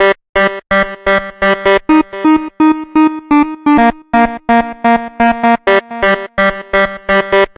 MS Gate 2
Loop from Korg MS 2000
sound, synthie, korg, siel, loop, bpm, trancegate, sample, 2000, gate, c64